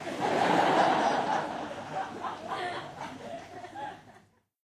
LaughLaugh in medium theatreRecorded with MD and Sony mic, above the people